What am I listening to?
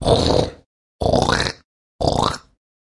Swine...
Recorded with Zoom H2.